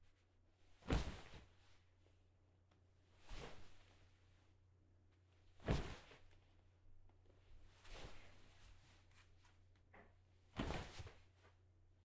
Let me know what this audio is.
flop on couch
The sound of someone sitting on a couch, ungently.
couch, flop, leather, sit